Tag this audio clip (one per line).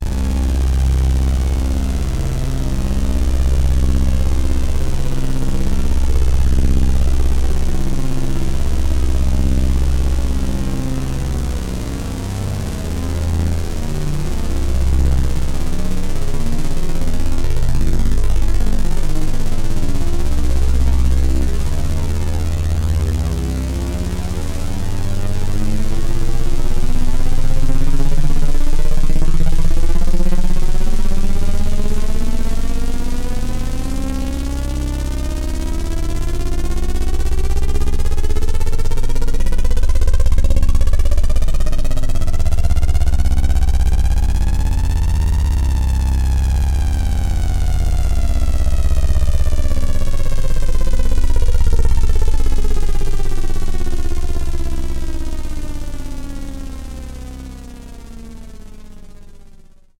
electronic
filtered
multi-sample
saw
synth
waldorf